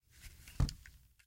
Placing gun on table.
table, gun, place